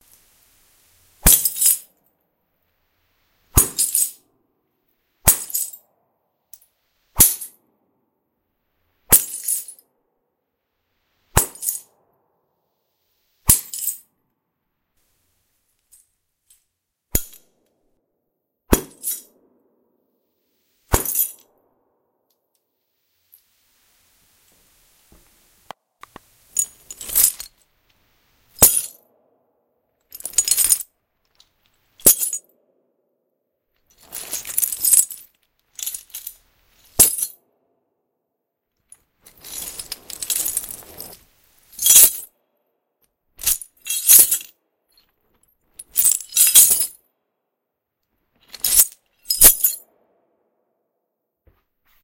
Punched Glass
A bag of broken glass punched with a bamboo stick. Recorded with a Roland R-05, processed with Logic X (saturated, eq'd).